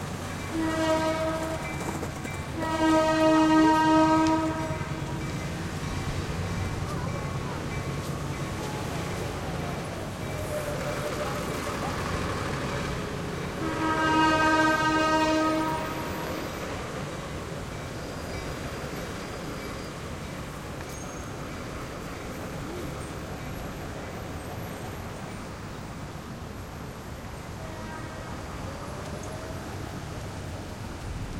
Freight Train in French Quarter New Orleans
Recorded with an H4n Zoom in the French Quarter New Orleans.
French-Quarter, Horn, New-Orleans, Train